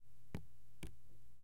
Drops on paper.